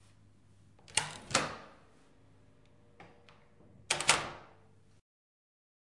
DOOR OPEN CLOSE-003
Door Open Close